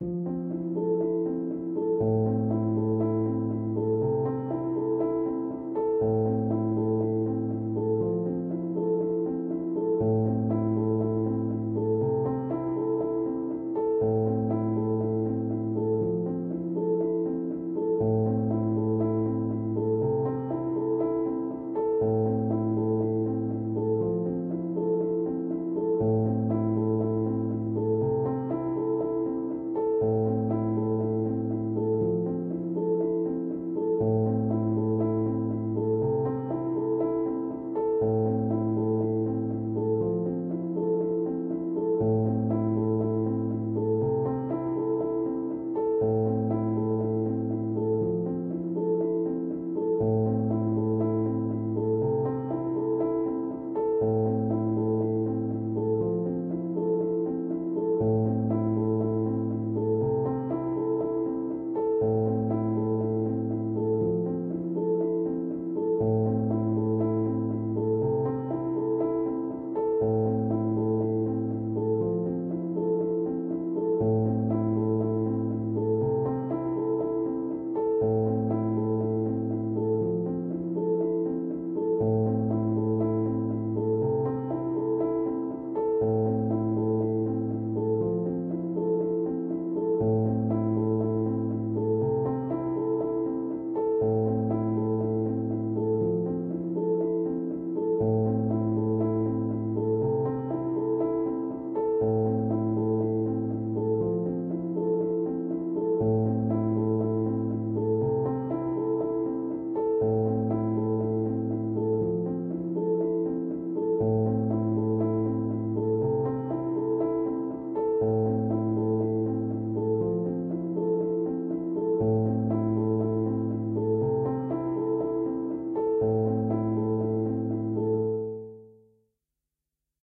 Piano loops 026 octave down long loop 120 bpm
samples, simple, simplesamples, free, reverb, 120bpm, music, loop, 120, Piano, bpm